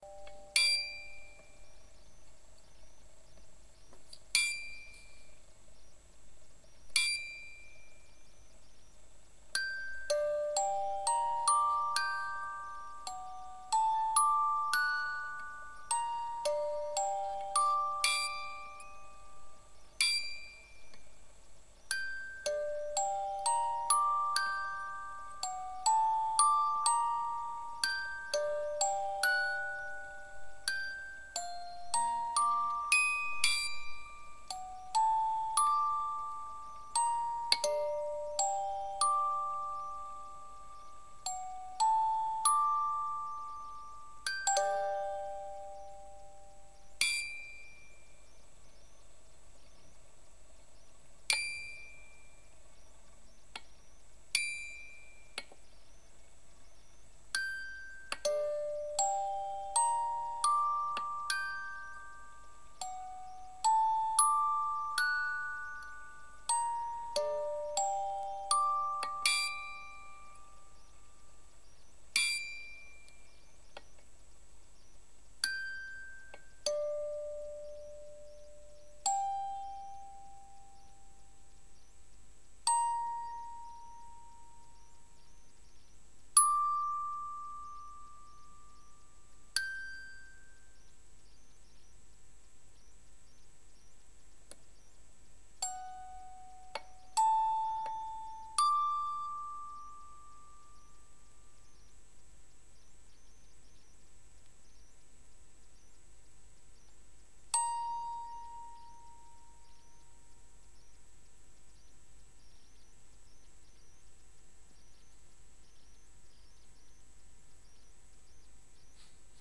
An old music box playing a little song.
antique, melody, music, music-box, old, song